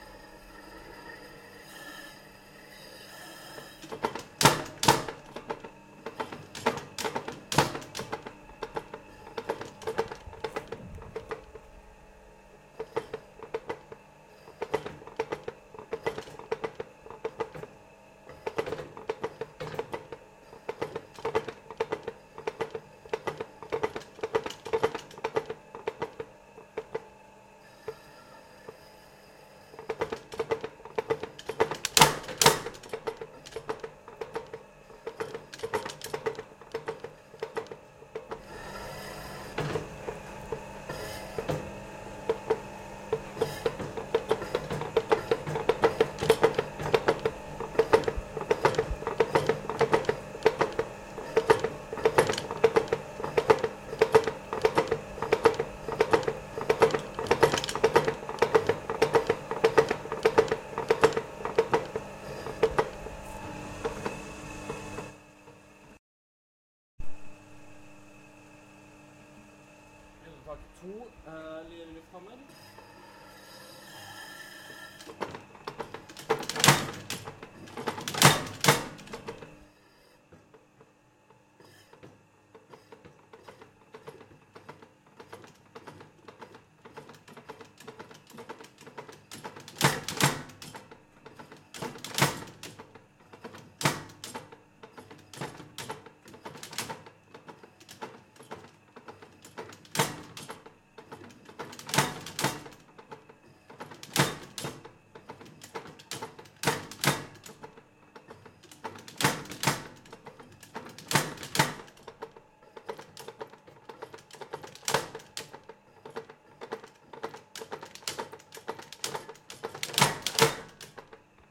Using a spring hammer in a smithy.
metal, Roland, spring